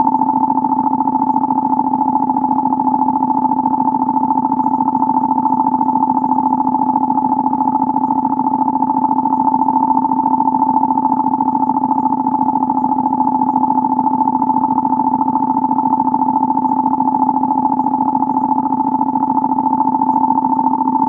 Astrogator3 STTOS recreated
A better attempt at a seamless-loop recreation of the original Star Trek series sound for the bridge function called "astrogator". I had to tweak my Analog Box circuit a bit to use a different form of filtering that was more resonant, and then, voila! I haven't gone back to compare it to the original, so I'm certain its not exact, but from memory it just sounds more like 95% instead of the 70% I would have given to my previous attempts.
noise, sttos, equipment, bridge, abox, loop, star-trek, background, sci-fi